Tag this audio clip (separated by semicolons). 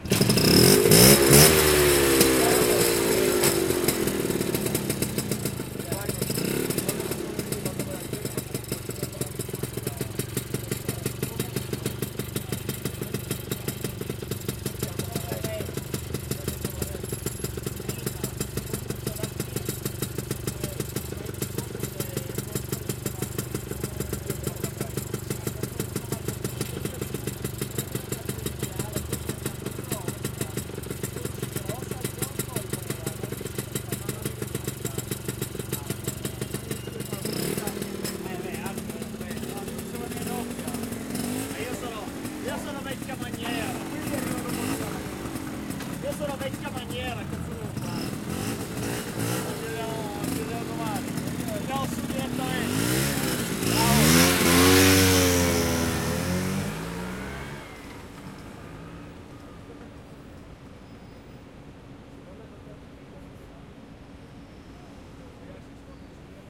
engine; ignition; piaggio; start; vespa